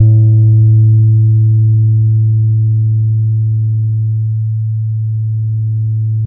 A 1-shot sample taken of a finger-plucked Gretsch Electromatic 30.3" (77 cm) scale length bass guitar, recorded direct-to-disk.
Notes for samples in this pack:
The note performances are from various fret positions across the playing range of the instrument. Each position has 8 velocity layers per note.
Naming conventions for note samples is as follows:
BsGr([fret position]f,[string number]s[MIDI note number])~v[velocity number 1-8]
Fret positions with the designation [N#] indicate "negative fret", which are samples of the low E string detuned down in relation to their open standard-tuned (unfretted) note.
The note performance samples contain a crossfade-looped region at the end of each file. Just enable looping, set the sample player's sustain parameter to 0% and use the decay parameter to fade the sample out as needed. Loop regions begin at sample 200,000 and end at sample 299,999.
1-shot, bass, multisample, velocity